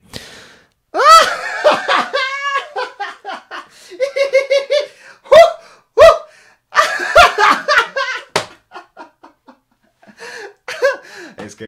maniac joker evil crazy stupid dumb man laugh laughter CLIPPED
laugh, crazy, dumb, evil, joker, laughter, man, maniac, clipped, stupid